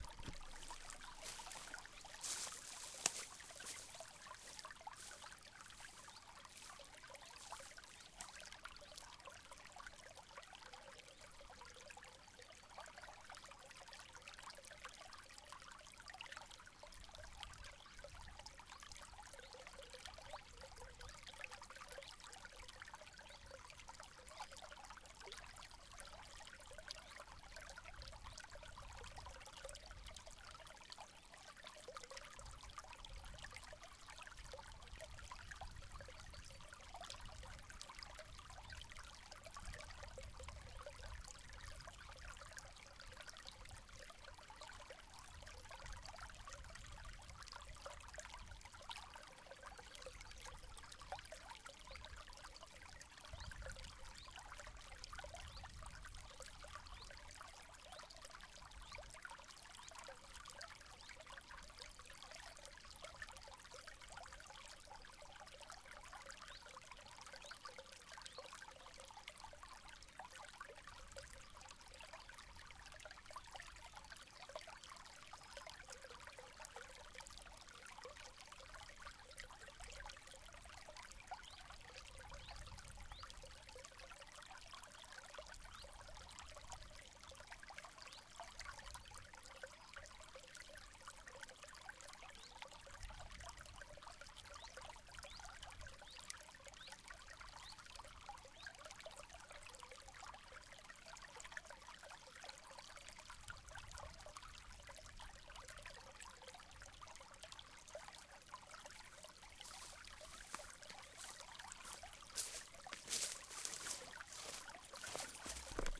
small-stream-spatial-sound-rear
field-recording small stream
stream, small